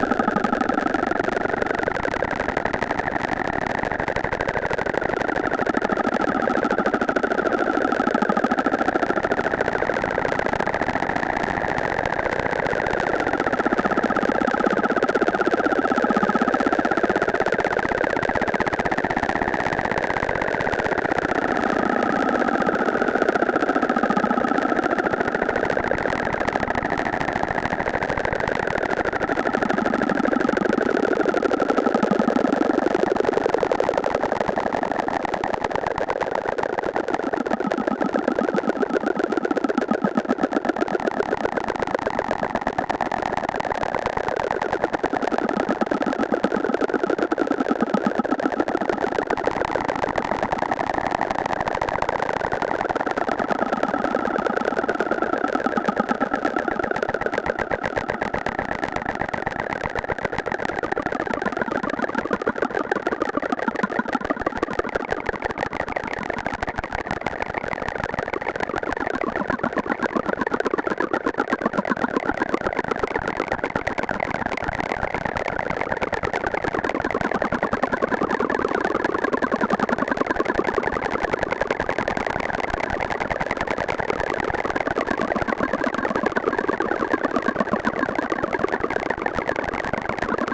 cricket like sound/atmo made with my reaktor ensemble "RmCricket"
ambient, clicks, cricket, glitch, noise, synthetic